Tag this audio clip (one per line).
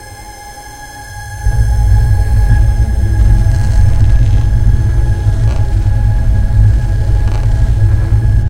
ambient
pad
sustained